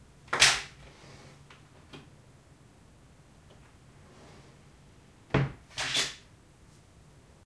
Opening-and-closing-old-wardrobe
Opening my old wardrobe, squeaky sound. The closing is much more quiet.
closing, opening, clothing-and-accessories, wardrobe